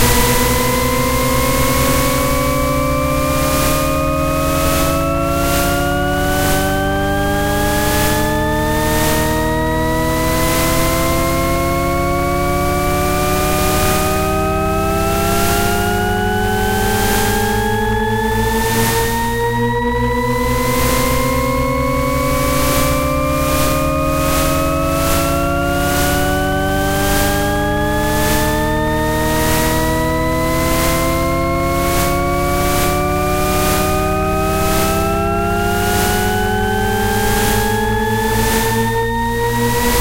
Two ever rising shepard tones rising at different rates overlaid by a pulsing hiss. This is a sound effect used to indicate a steadily approaching peril. It can be seamlessly looped.